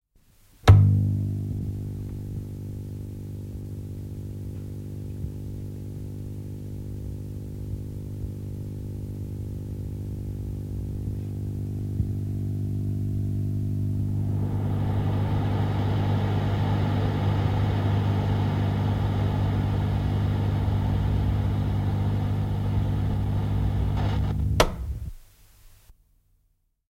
Radio, putkiradio / Old radio, switch on, humming, warming up, noise, switch off, 1950s, 1960s
Vanha radio auki ja kiinni. Kytkimen napsahdus, matalaa hurinaa, vähitellen suhinaa, laite kiinni.
Paikka/Place: Suomi / Finland / Helsinki
Aika/Date: 1976
Suomi, Tehosteet, Old, Kiinni, Soundfx, Auki, Yle, 1950s, 1960-luku, On, Putkiradio, Field-recording, 1960s, Off, Finnish-Broadcasting-Company, Vanha, Yleisradio, 1950-luku, Radio